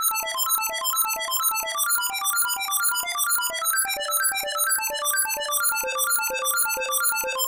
MIDI/OSC lines generated with Pure-Data and then rendered it in Muse-sequencer using Deicsonze and ZynAddSubFX synths.
electro, synth, bpm-128, music, 4, techno, elektro, loop, modern, new, ambient, electronika